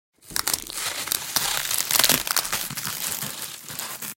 A combination of Cracking noises
design, crack, bone